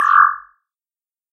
SciFi Inspect Sound, UI, or In-Game Notification 01
SciFi Inspect Sound, UI, or In-Game Notification.
If you enjoyed the sound, please STAR, COMMENT, SPREAD THE WORD!🗣 It really helps!
More content Otw!
App, beep, Bleep, blip, button, casino, click, film, game, interface, menu, movie, option, select, ui, user